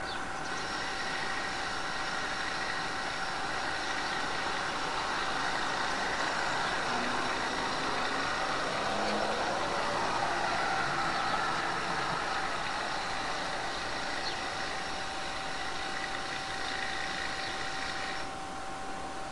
jackhammer tool loud